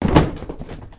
S022 Plastic Impact Mono
Rally car colliding with a plastic barrier